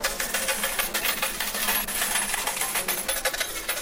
slot machine payout
Coins coming out of a slot machine, with casino ambience. Loopable!
I isolated the coin-dropping parts from #320006 and sped them up.
slot-machine, coins, jackpot, payout